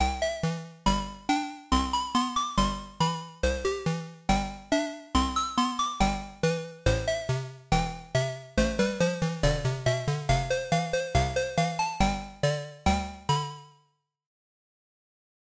8-Bit Loop
A 8-Bit inspired synth loop made in FL Studio. Thank you and enjoy!
8, super, bros, bit